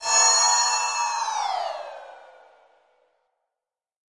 cymb shwish 04
cymbal hit processed with doppler plugin
cymbal, doppler, hit, plugin, processed